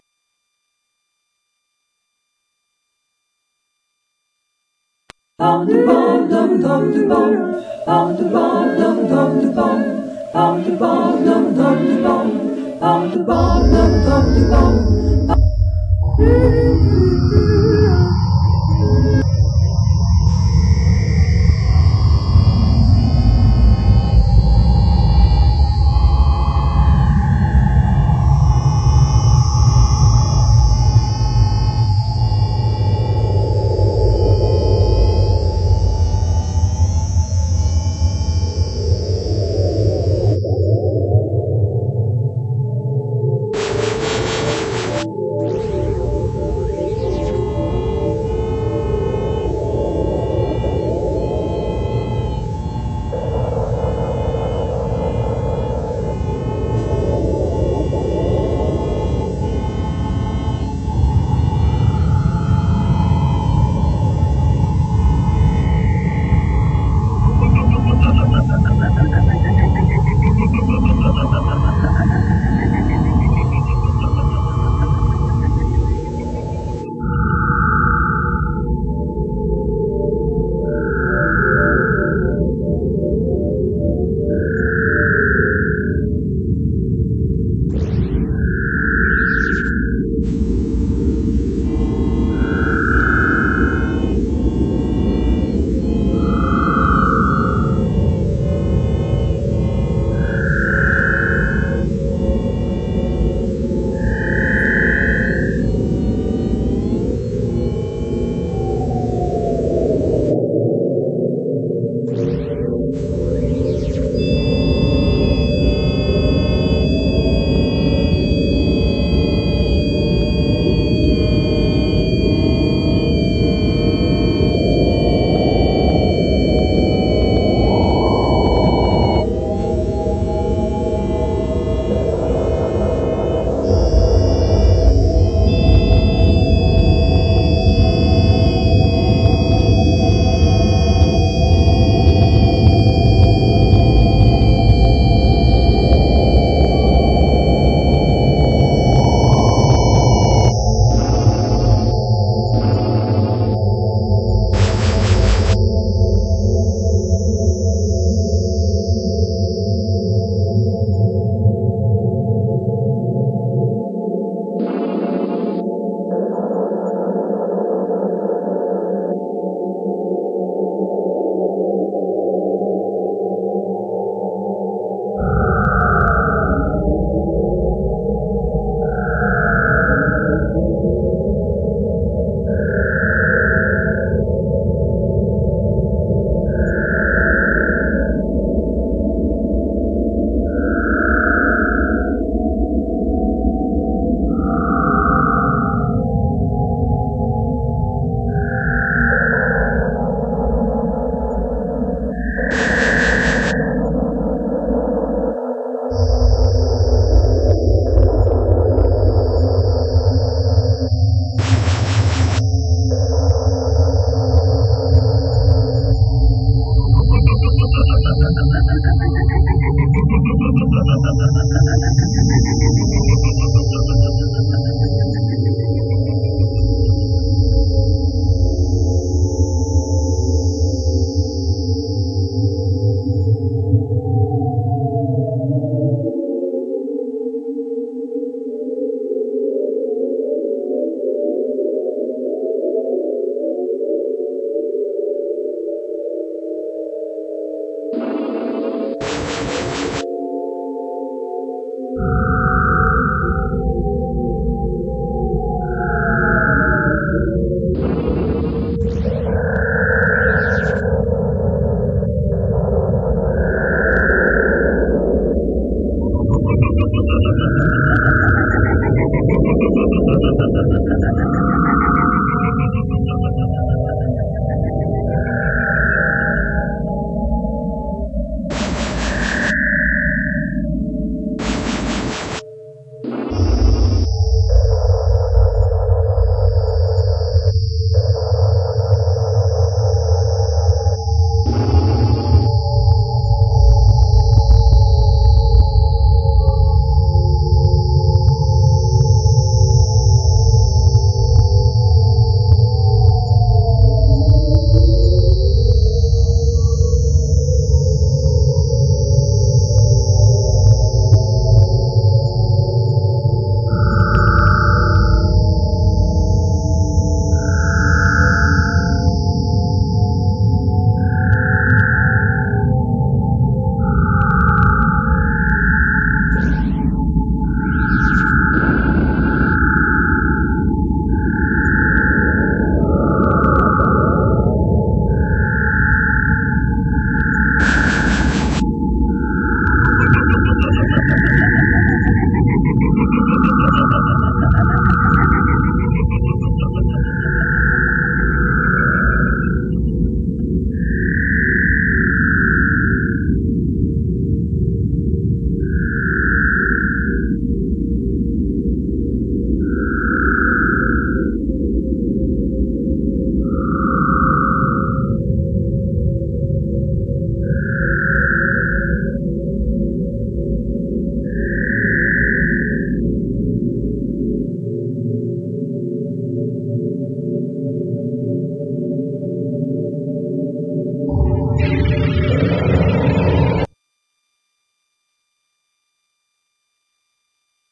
Crazy space noises made with either coagula or the other freeware image synth I have.
image, noise, synth